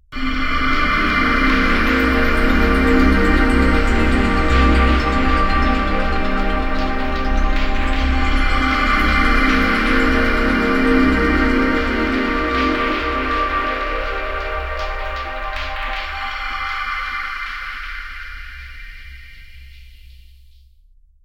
Made with reason + absynth mastered and mixed in Audition.Tried to make a unique heavenly sound so... enjoy :]
angel, vocal, synth, cinematic, trip, hell, female, demon, pad, ambience, chord, heaven, ghost